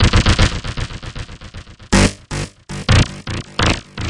glitchy loop courtesy of Malstrom synth